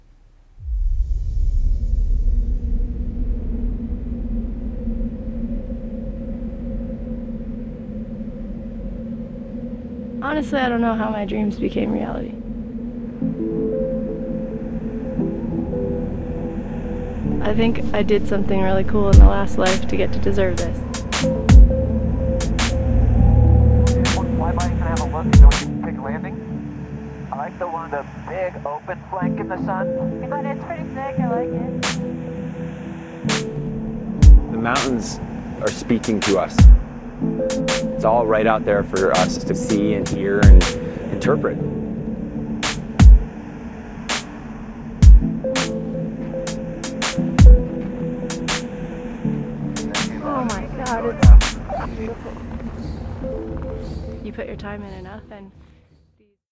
Solar fi musically is a derivation of hip hop lofi. Solar fi proposes the introduction of positive elements towards tomorrow or the near future in the lofi. It uses sounds borrowed from space music, from IDM, also considering Celtic melodies or from folklore instruments in general, to which to apply slow beats typical of lofi. These tracks are framed by recordings extracted from videos of live moments in contact with nature, extreme feats and explorations of wild worlds, usually made with action cameras